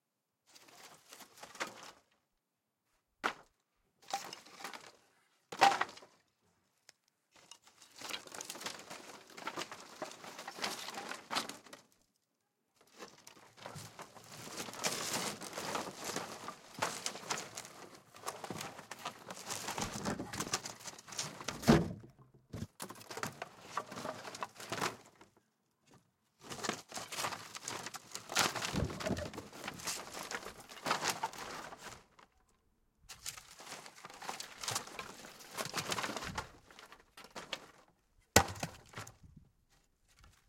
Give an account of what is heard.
Wood panel board debris rummage increasing
Part of a series of sounds. I'm breaking up a rotten old piece of fencing in my back garden and thought I'd share the resulting sounds with the world!
board, debris, increasing, panel, rummage, Wood